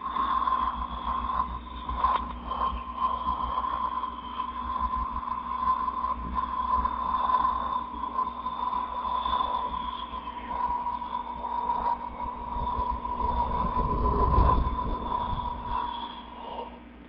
This is a simulation to a organic fluid song
liquid, medical, fluid, organic